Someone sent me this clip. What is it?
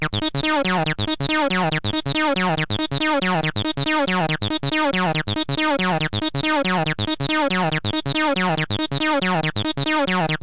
acid old skool tb303 house techno future retro revolution tweaking filter synth electronic
tb303, revolution, house, skool, filter, retro, synth, future, electronic, tweaking, old, acid, techno